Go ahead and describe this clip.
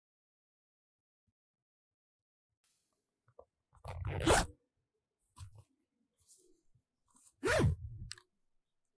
Unzip and zip of school bag
CZ, Czech, Panska